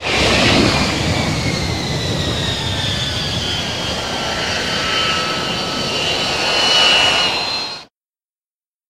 Space Ship Landing
Interpretation of a modern vertical fighter jet landing as a sci-fi starship landing. Few Audacity edits.
war, fiction, vehicle, modern, warfare, singularity, flight, science, army, airplane, transportation, plane, flying, warship, star-wars, start-trek, technology, armies